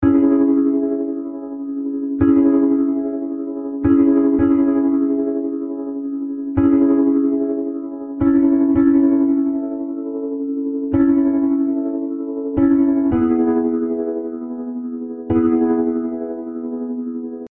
PIANO E maj 55
lo-fi, loops